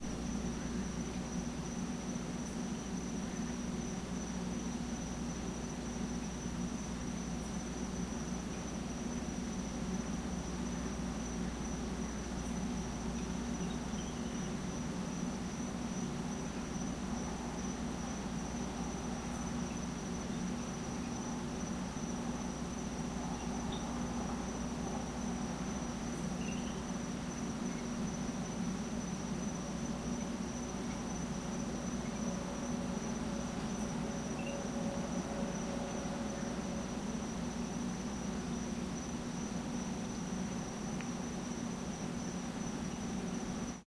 police chopper7 gone3 frog
Police helicopter and a dozen cop cars, including a K-9 unit searching the hood, recorded with DS-40 and edited in Wavosaur. Renegade frog on the loose.